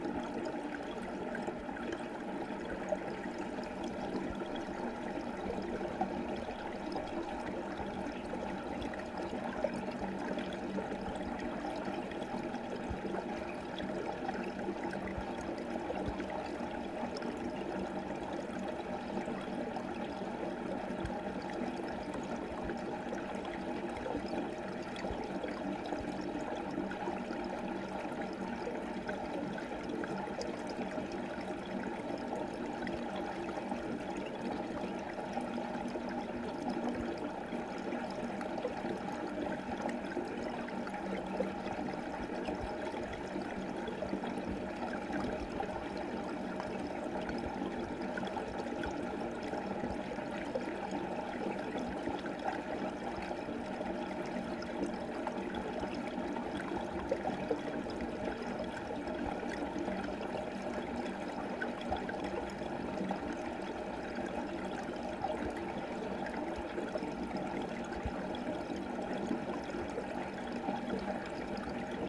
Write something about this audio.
Stream entering pipe
I recorded this using an AT8015 shotgun mic pointed at a spot in a stream (about 4 inches away) where the water enters a small pipe to cary water under a dirt bridge.
field-recording, echo